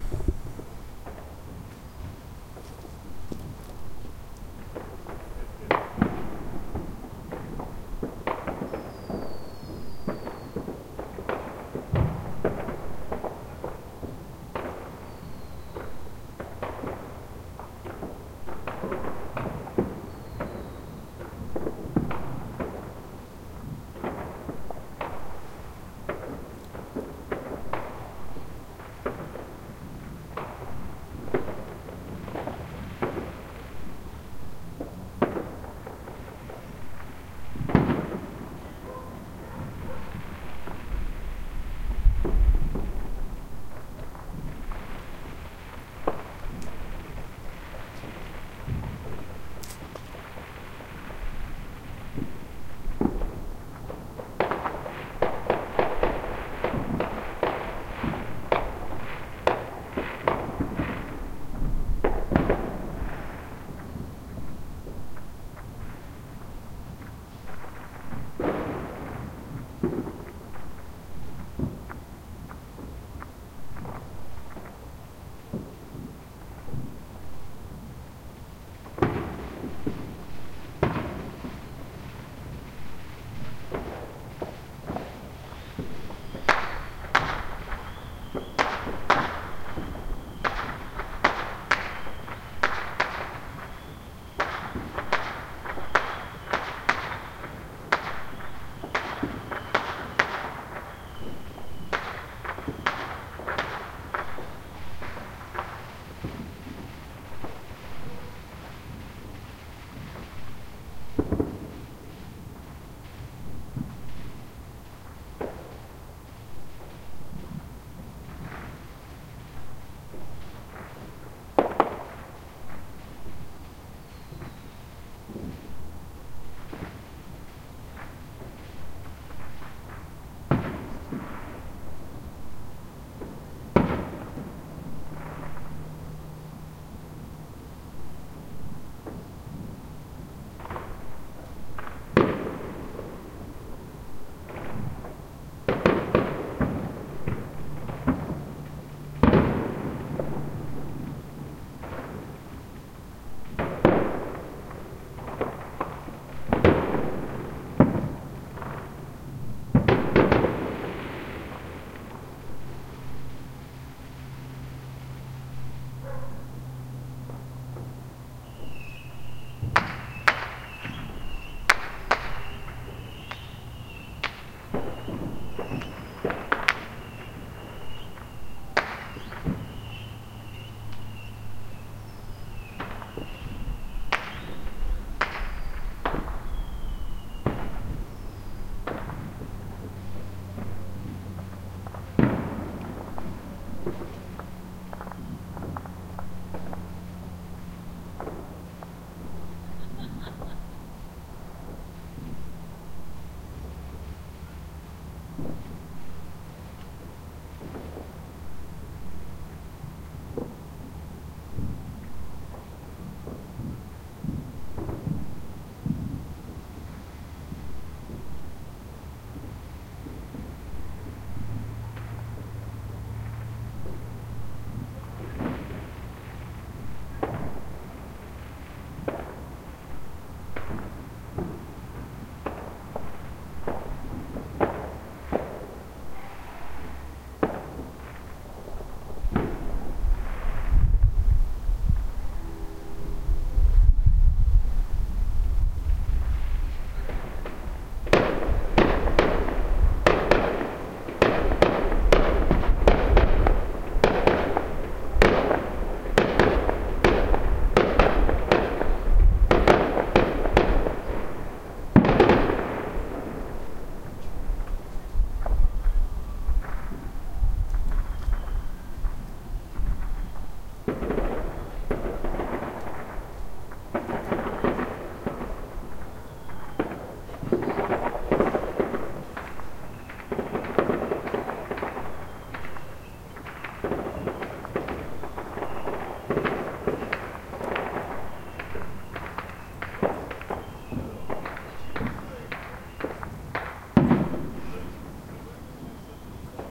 NE PDX 4thJuly
-recorded in north east portland oregon on July 4th, 2012 at apprx. 10:30pm with a Zoom H2 in 4 channel mode on a tripod then imported into Audacity and Normalized and 6db boost added.
Ambient, Field-Recording, Fireworks, H2, Holiday, Laughter, Neighborhood, Outdoor, Soundscape, Zoom